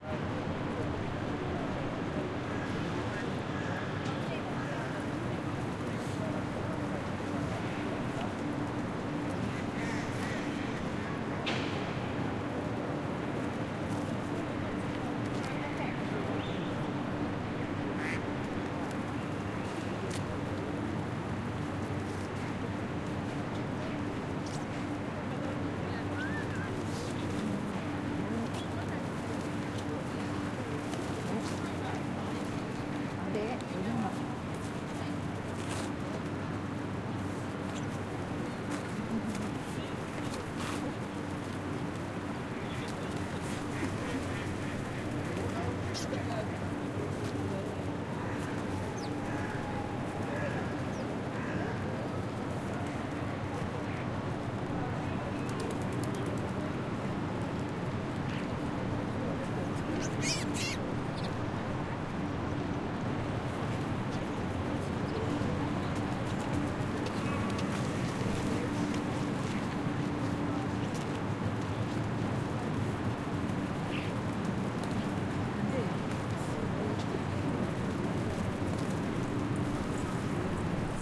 Under the Eiffel tower in Paris : tourists and ducks recorded on DAT (Tascam DAP-1) with a Rode NT4 by G de Courtivron.